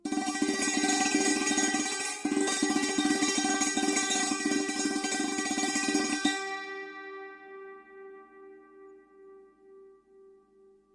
sant-roll-G4
recordings of an indian santoor, especially rolls plaid on single notes; pitch is indicated in file name, recorded using multiple K&K; contact microphones
percussion, roll, pitched, acoustic, santoor